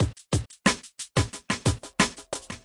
A good slow tempoed DnB beat for an intro.
Beat, DnB, Frenetic